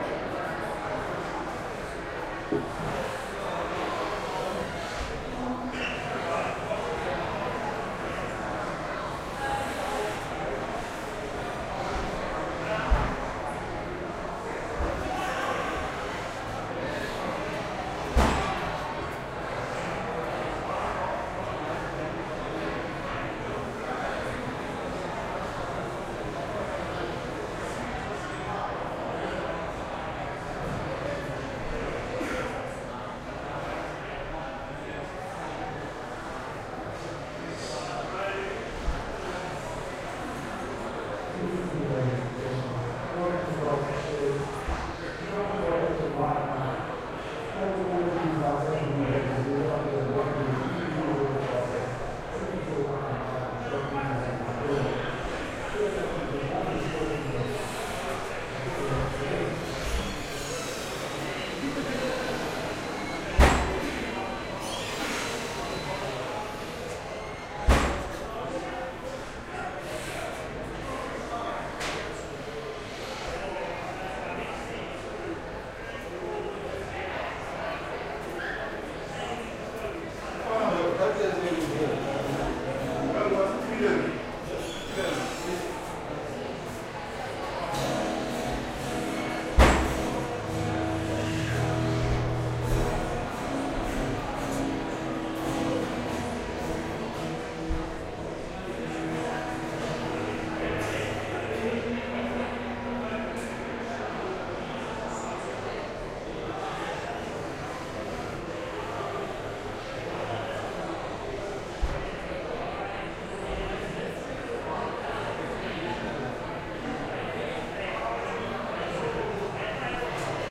Restaurant ambience V2
This was recorded with an H6 Zoom recorder at a bar called 28 Degrees Thirst, it served as a good form of ambience for public scenes with people talking in the background for a public setting.
ambiance, ambience, bar, crowd, field-recording, general-noise, noise, OWI, people, public, restaurant